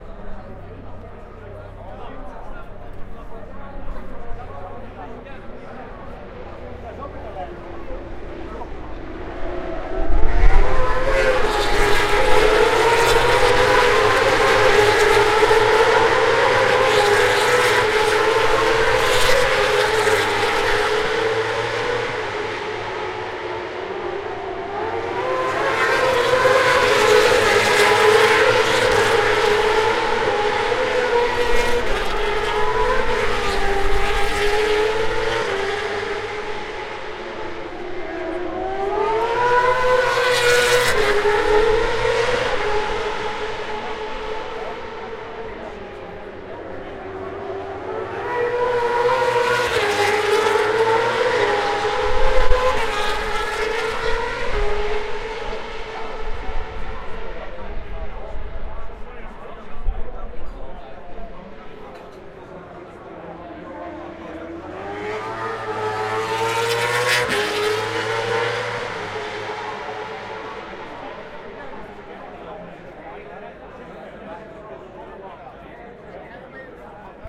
Moto2 pass recorded at TT Assen June 27, 2015
TT Assen Moto2 pass recorded with a Zoom H1 audiorecorder. Place of recording; Geert Timmer bocht.
audiorecorder, fieldrecording, h1, moto2, motogp, race, racing, zoom